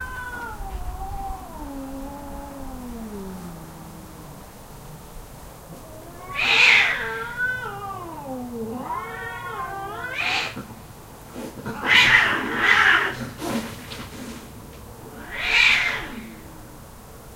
Cats Fighting Amplified
"You want sum? I'll give it ya!" Two neighborhood cats going at it.
This is the amplified version of my original "Cats Fighting" recording. The original and an amplified-and-noise-removed version of the same recording are also available. Recorded with a Tascam DR-05 Linear PCM recorder.
pets, animals, cat-fight, cat, field-recording, fight, cats, hiss